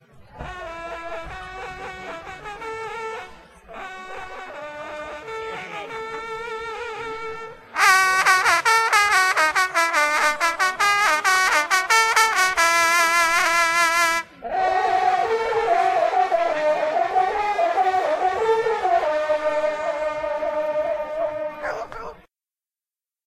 hunting horn players team registered at a hunting horn contest in Montgivray (France)
france, horn, hunting, traditions